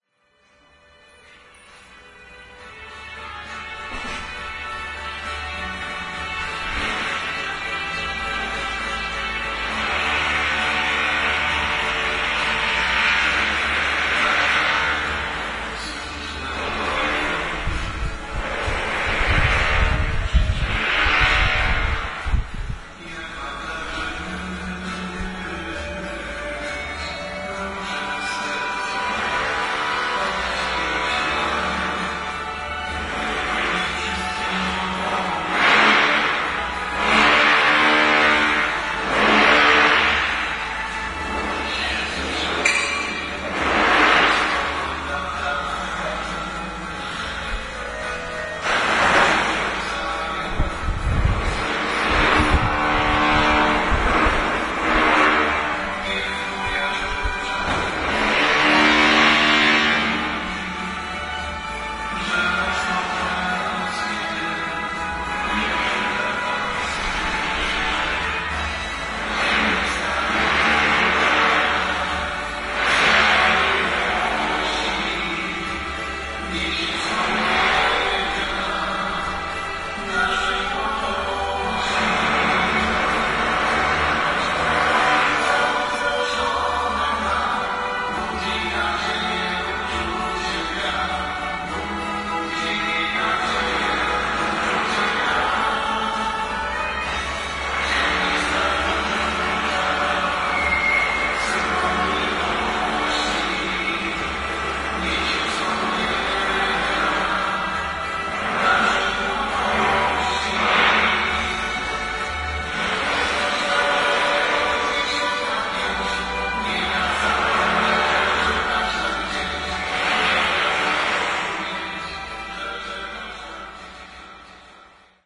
21.08.09, 16.00 a.m. a tenement courtyard in the center of Poznan. neighbours are listening the music (at the front of my windows, on the 3 or 4 floor) at the same moment the dude from the groundfloor is drilling walls.
courtyard, drill, drilling, music, poznan